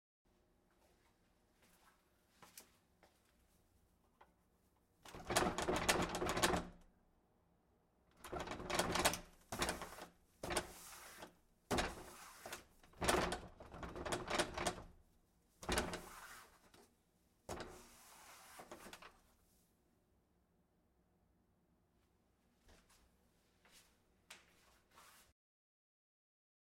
Broken Door Push
An old door broken pushed with force
Door, Push, Broken